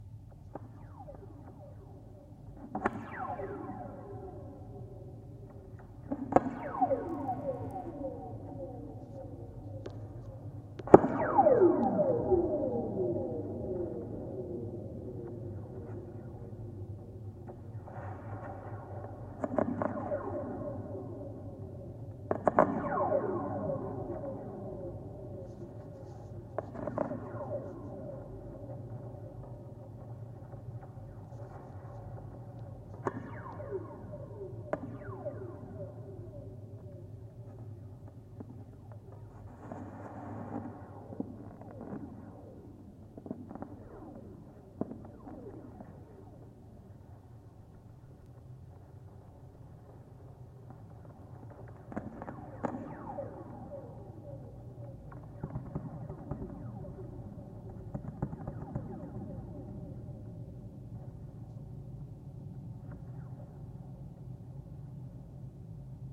Contact mic recording of radio tower support cables (former DeKalb, Illinois, USA station WNIU). Characteristic "ray gun" sound when wire is struck. This is the shortest of five cables in this group.